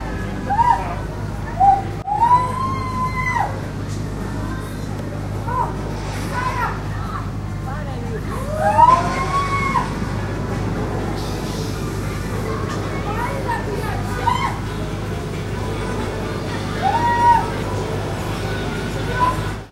screams of girls inside a fair 3d machine